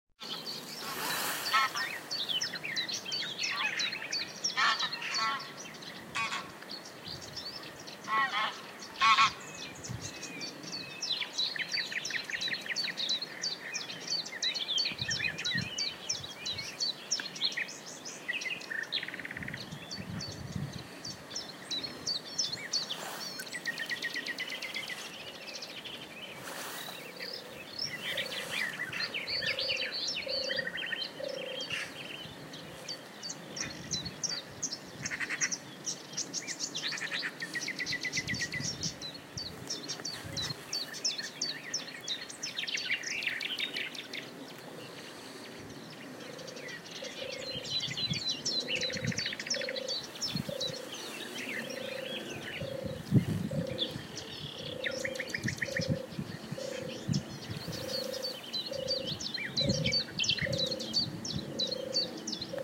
Kwade Hoek songbirds and others
Chiffchaff, nightingale and many other birds singing their springsong in the dune valley at Kwade Hoek on the Dutch isle of Goeree.
Recorded with iPhone 5s on May 15th 2015.
birds, birdsong, dune-valley, field-recording, nature, spring